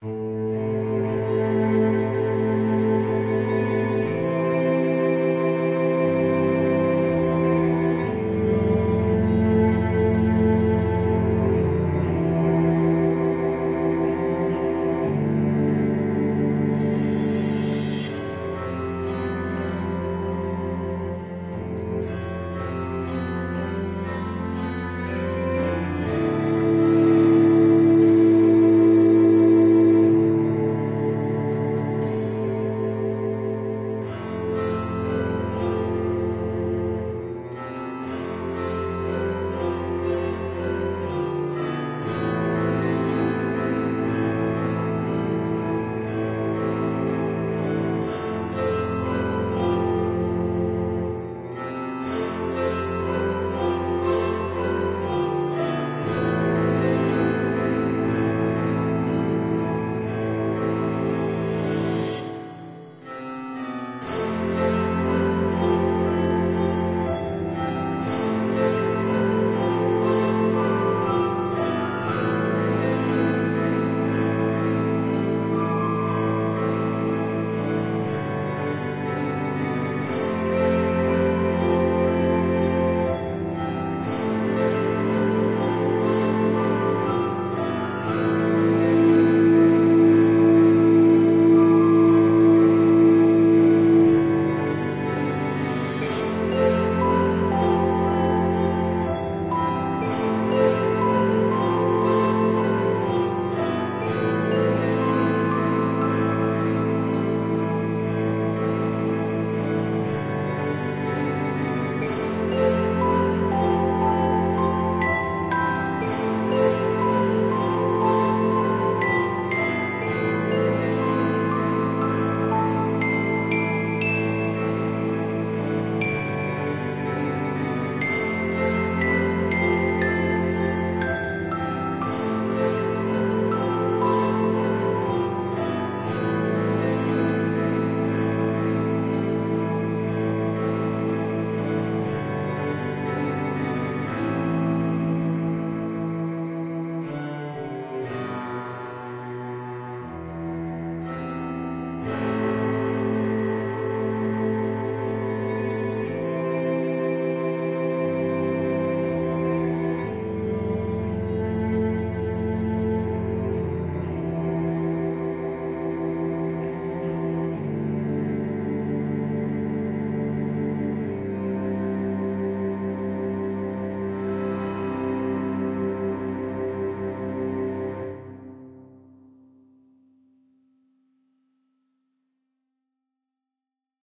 Awesome Emotional Piano 2
journey, movie, awesome, drama, orchestral, cinematic, tear-jerking, piano, film, emotional, dramatic, sad